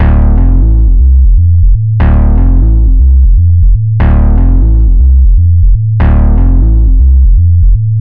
bass loop 0055 a 120bpm
bass loop 120bpm
electro, loop, bass, 120bpm